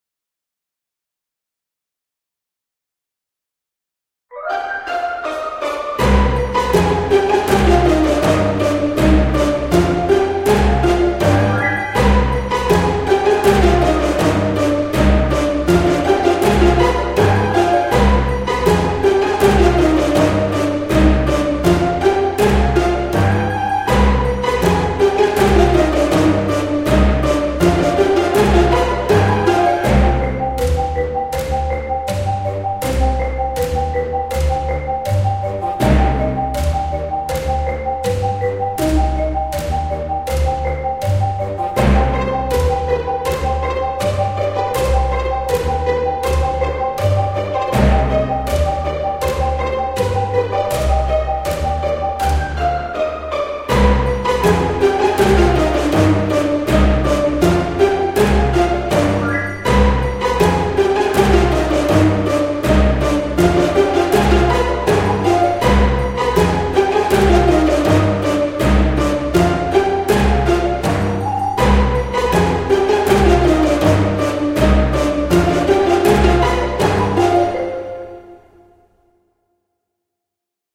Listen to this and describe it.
Funny Background Music Orchestra
Title: Trouble
Genre: Comedy, Trouble, Orchestra
One of my old composition trying to explore the Harmonic Minor Scale.
background, brass, film, funny, game, movie, orchestra, percussion, string, triangle, trouble, video, violin, woodwind